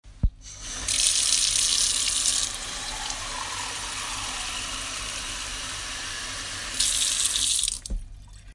Torneira de água
Water running from a tap.
tap, water, running